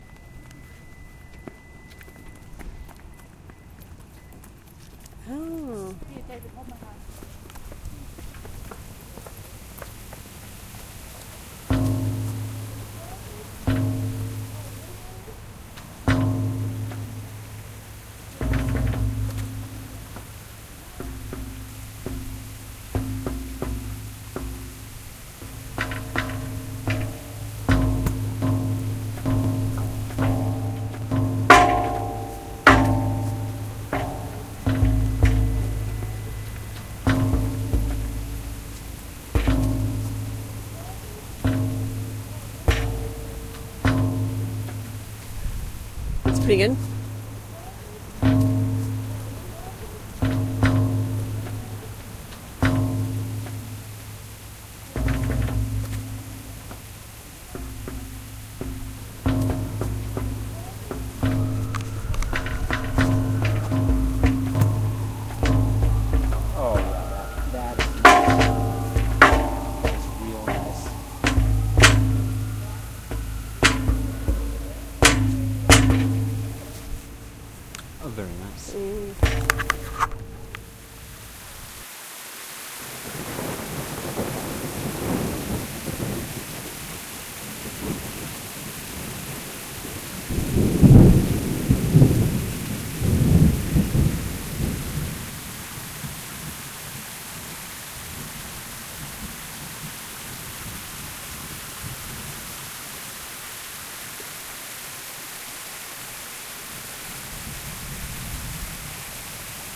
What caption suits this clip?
a remix of a field recording of people in a parking lot, and sounds from percussive metal sign